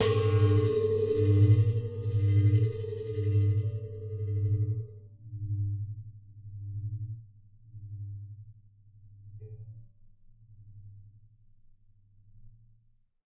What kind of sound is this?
A large metal pot suspended on a rubber band. The pot is hit while spinning.
Note: you may hear squeaking sounds or other artifacts in the compressed online preview. The file you download will not have these issues.
hit, metal, metallic, pan, pot, resonant, sound-design, spin, spinning, wah